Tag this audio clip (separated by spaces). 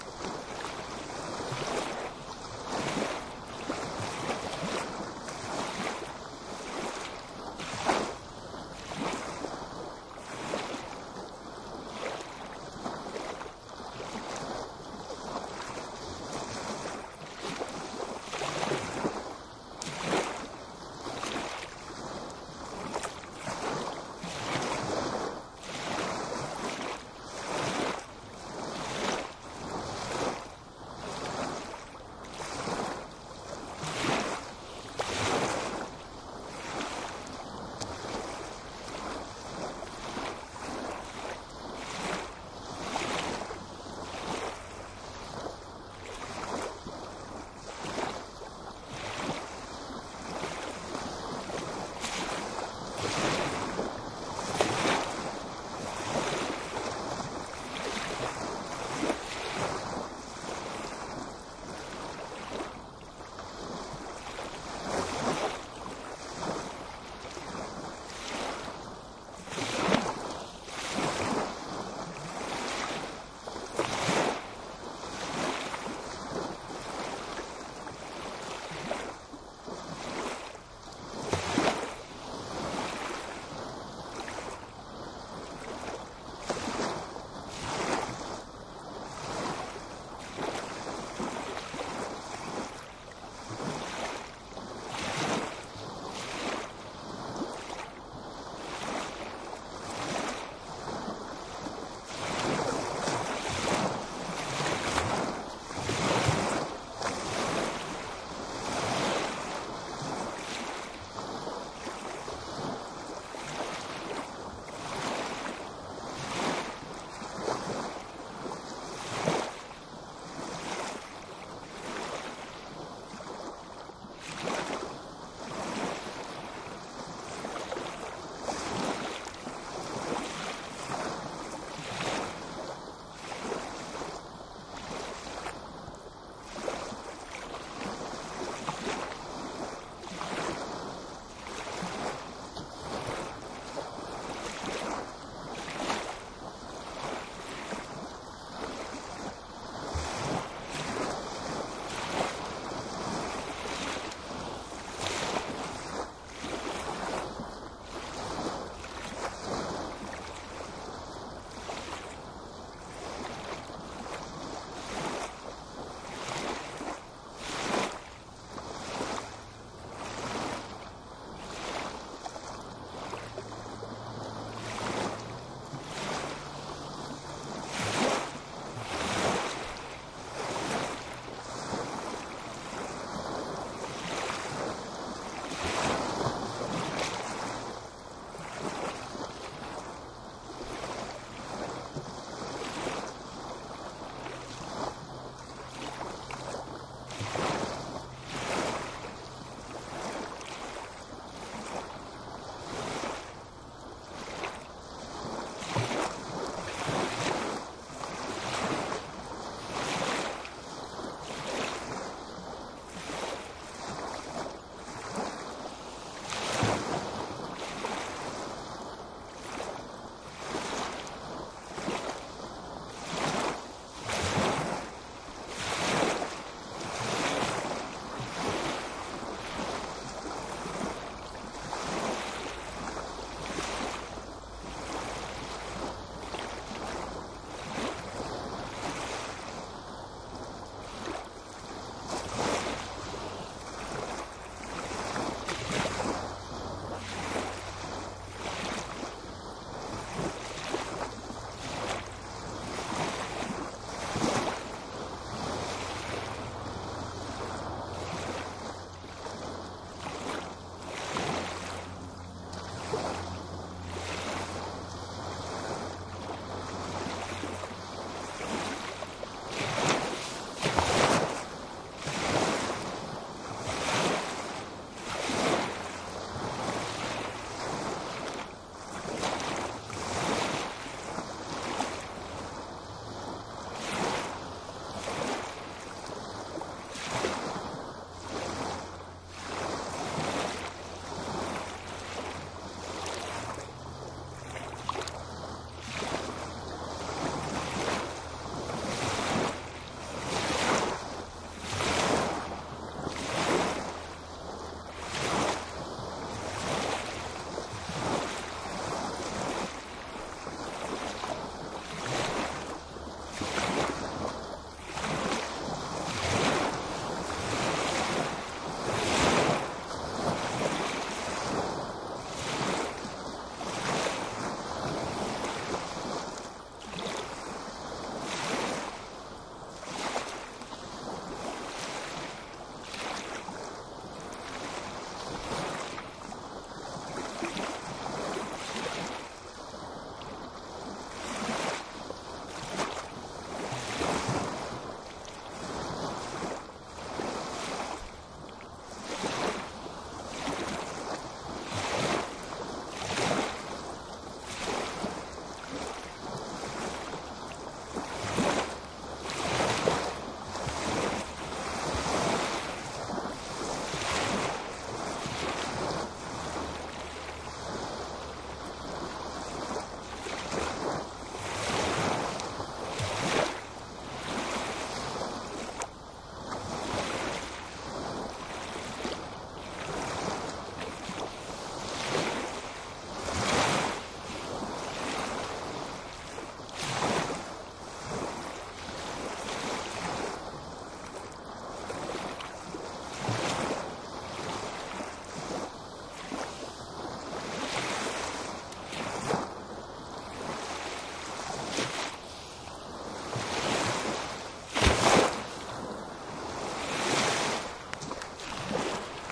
field-recording water waves lake